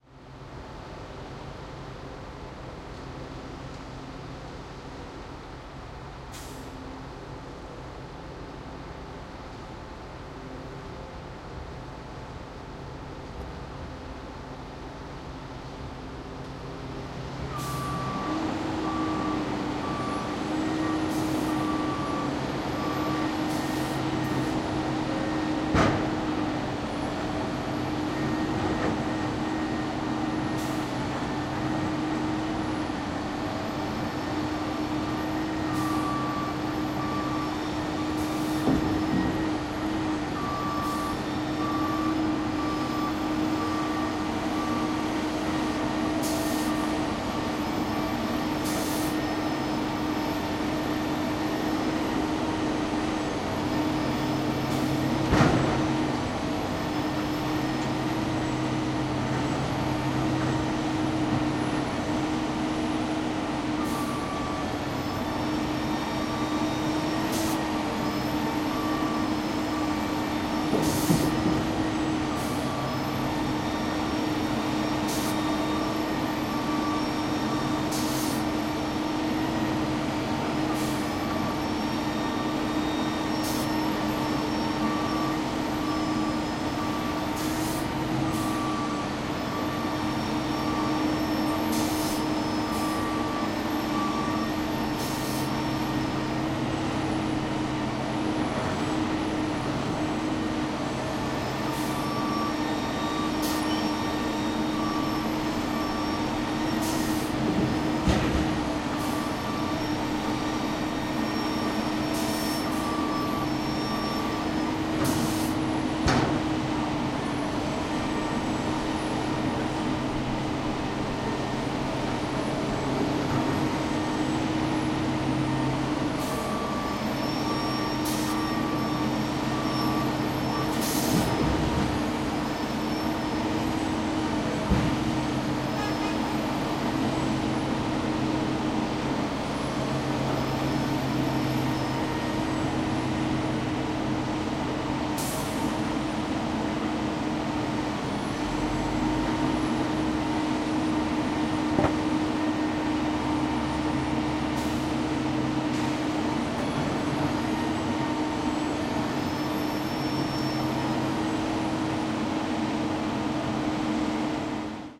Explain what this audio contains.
AE0095 Large machine tears up the sidewalk
The sound of a construction/demolition machine removing pieces of sidewalk and loading it into a dumper truck. Recorded with a Zoom H2.